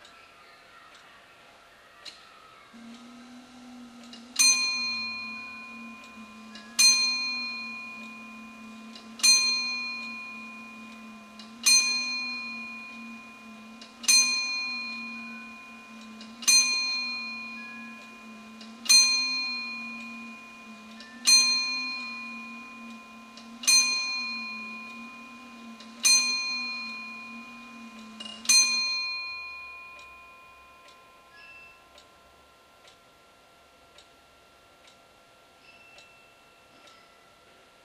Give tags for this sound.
ticking; pendulum; century; wall-clock; antique; clock; 18th

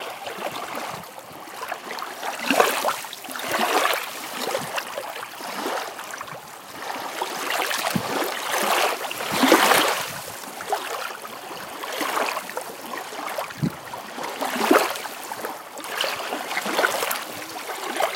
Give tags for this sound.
noise; nature; ambient

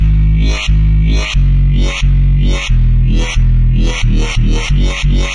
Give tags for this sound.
DnB; Loop; Vocal; Lead; Heavy; Dream; Fast; 179BPM; Rythem; dvizion; Vocals; DrumAndBass; Pad; Beat; Melodic; Drums; DrumNBass; Drum; Bass; Synth

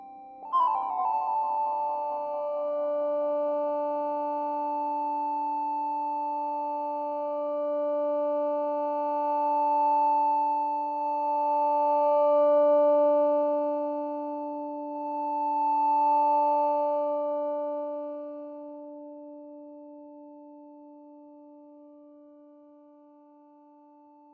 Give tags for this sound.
ambience; atmosphere; electronic; music; processed; sci-fi; sine; synth

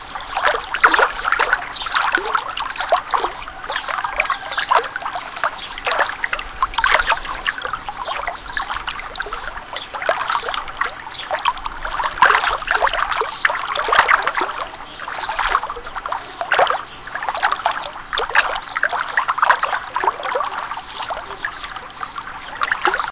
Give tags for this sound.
birds; swimming-pool; water

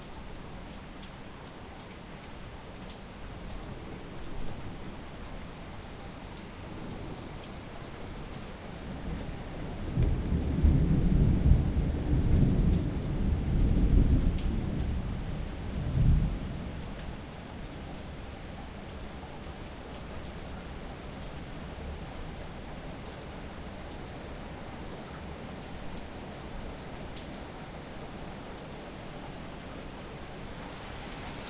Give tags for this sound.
rain; thunder; wind

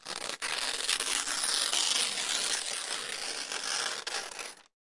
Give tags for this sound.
tearing-apart; tearing-paper; noise; tear; newspaper; magazine; stereo; breaking

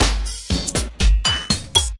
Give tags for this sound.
rhythmic; 120BPM

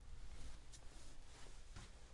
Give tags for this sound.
cloth; moving; slide

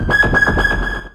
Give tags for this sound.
multisample; one-shot; synth

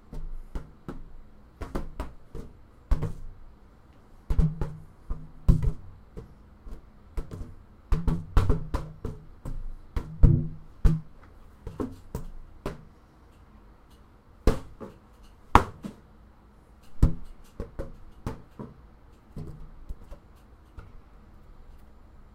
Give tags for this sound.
bat; swat; play; balloon

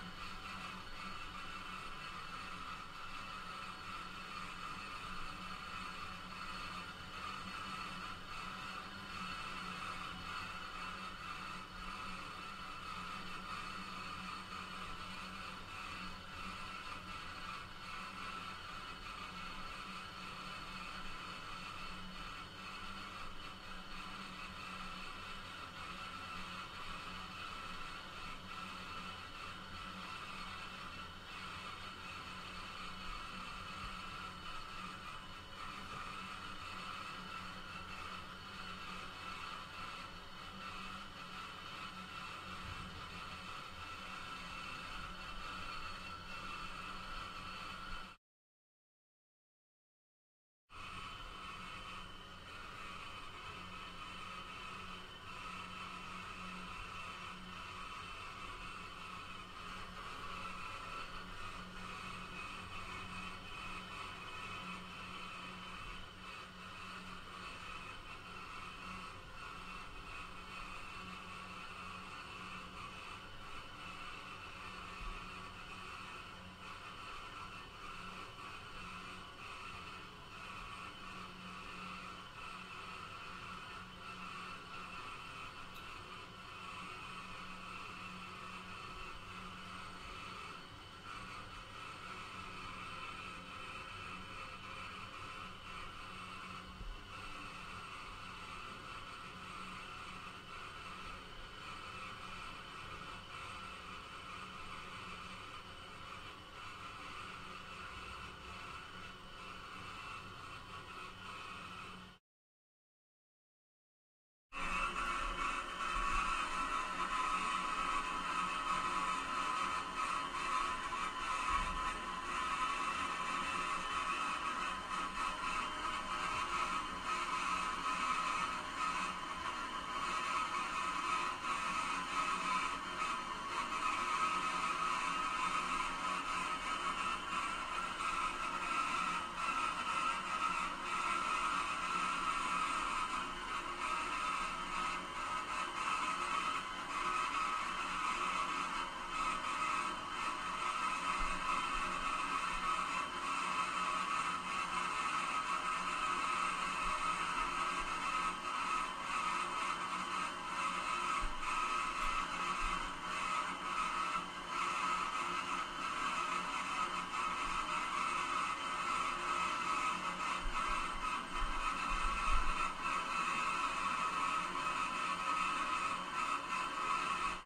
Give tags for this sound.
pipe; steam; hiss

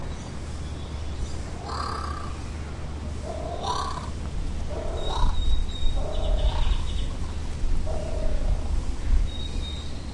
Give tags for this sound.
aviary; bird; birds; exotic; field-recording; kookaburra; tropical; zoo

